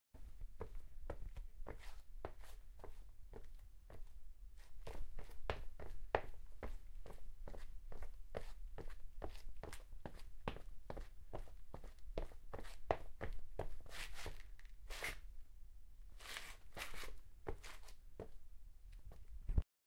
Walking fast
boots, ambient, walking, steps, foot, shoes, footsteps, floor, walk, running, dusty, fast